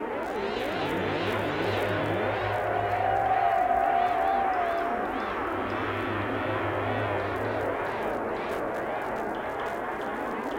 teeny tiny very quiet chirping birdies
voice, multi